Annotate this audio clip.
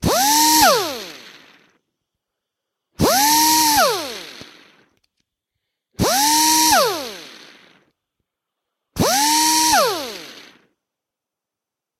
Hempstead Ata Hemel st24le straight die grinder started four times.